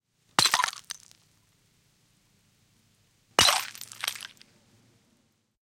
Pullo, lasipullo rikki / A full glass bottle breaks on the street, 2 x
Täysinäinen lasipullo putoaa kadulle ja menee rikki. 2 x.
Paikka/Place: Suomi / Finland / Nummela
Aika/Date: 17.08.1988
Bottle, Break, Chink, Fall, Field-Recording, Finland, Finnish-Broadcasting-Company, Glass, Kilahdus, Lasi, Pudota, Pullo, Rikki, Rikkoutua, Shatter, Soundfx, Suomi, Tehosteet, Yle, Yleisradio